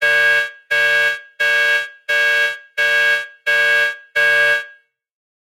Generic Spaceship Alarm 01
A generic spaceship alarm.
alarm, alert, futuristic, game, sci-fi, space, spaceship, synthetic